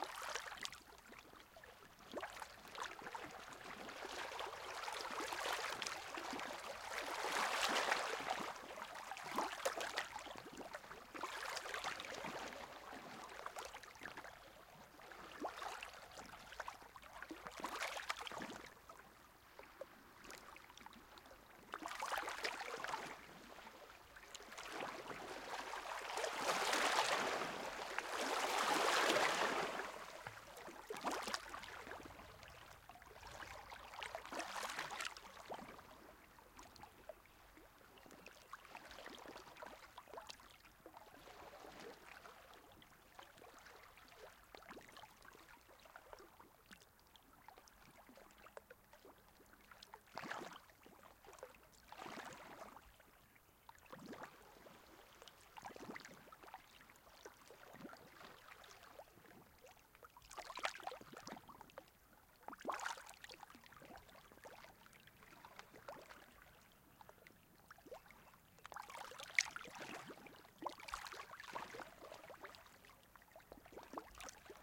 Lagoon water close 2
Lagoon ambience in summer, daytime. Small waves (close).
Stereo, MS.
Recorded with Sound devices 552, Sennheiser MKH418.